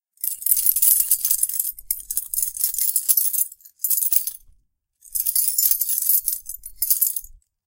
Keys rattling [Key rattle]
This is a very basic sound of rattling of a bunch of keys.
Please personal message your requests, I will do my best to help !
key, keys